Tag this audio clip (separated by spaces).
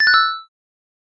collect item life object game